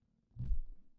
BALLOON BALL LOW
BALL, BALLON, LOW